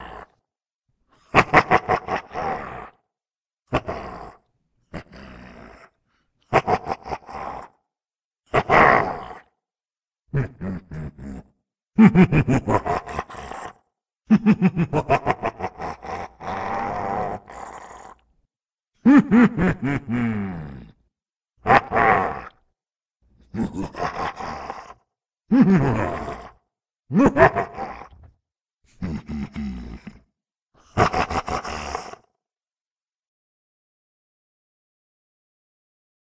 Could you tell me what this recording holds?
Someone asked for evil laughs, so I made with the evil. Um, ta da?